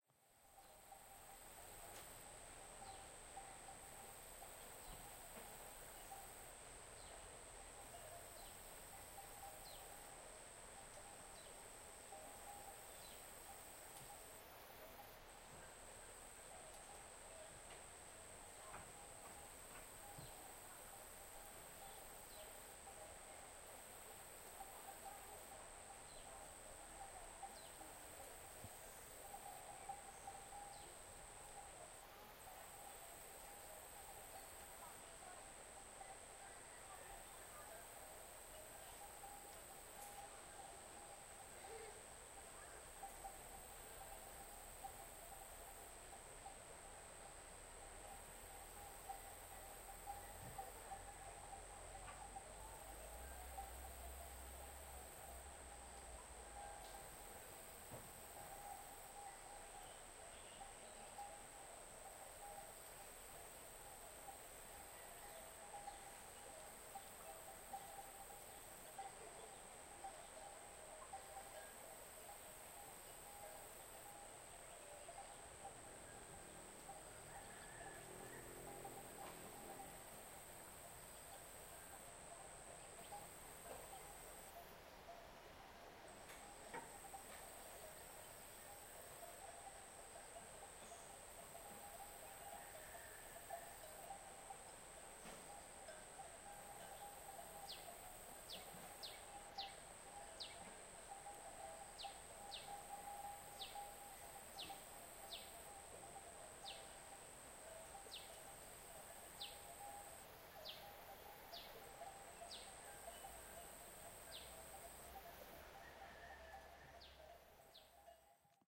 Thai farm with cows
Cows in the distance across a river.